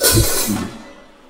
rubbing and scraping noise on a leather of a jdembe.
I'm interest about what you do with this sort of sound.